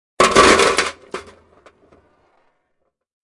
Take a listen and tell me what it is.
$ BULLETS - falling on metal table
Several bullets (AA batteries) falling on a metal pan. Good for crime scenes?
metal, table